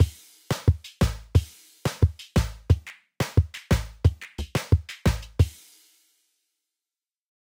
Chilly Billy 90BPM
A chilling drum loop perfect for modern zouk music. Made with FL Studio (90 BPM).